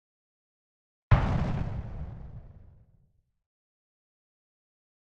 Synthesized using a Korg microKorg